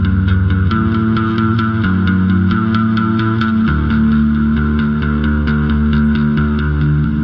distant bass
A bass guitar loop played at 132 BPM.